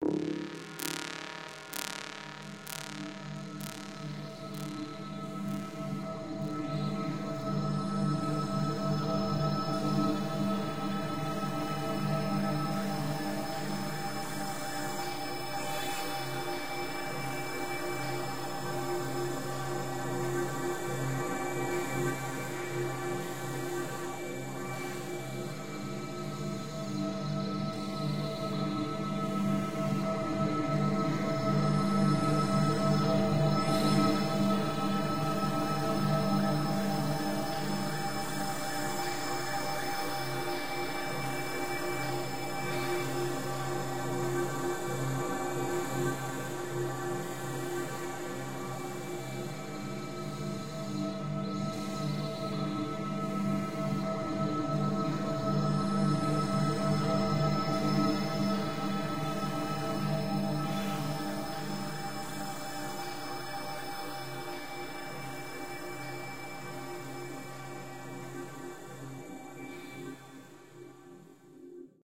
A dark, glitchy ambient soundscape made in FL Studio.